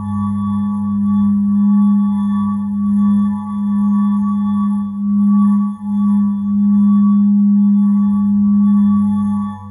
cello himself
gradual mutation from white noise to the resonance inside of my cello.recording - playing back - recording... like "I'm sitting in a room" the cello versionthis is the 9nth
stage
acoustic, cello, processed